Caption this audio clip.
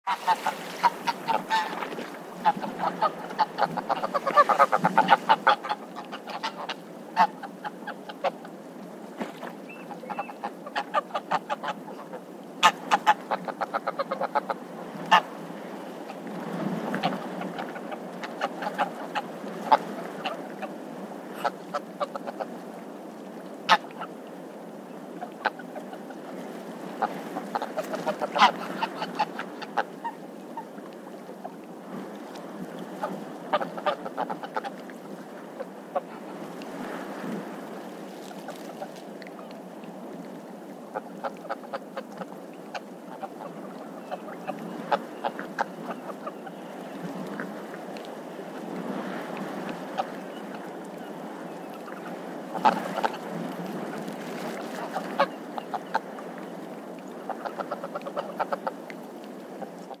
Petites oies
Somme little geese i recorded in the BAie de Somme. Probably Anser fabalis.
anser, geese, goose, oies